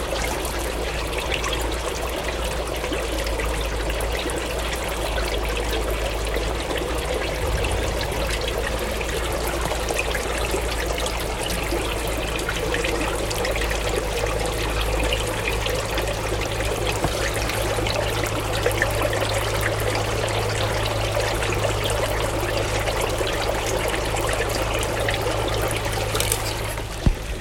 Stream Katschberg 4
Bubbling stream in Katschberg, Austria